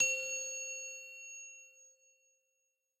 simple *ting* sound